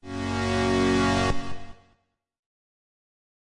Organ Style Rise 140 BPM
A rise effect created using Access Virus C and third partie effects.
140-BPM, Dance, EDM, Electronic, FX, Hit, Loop, Music, Sample, effect, sound-effect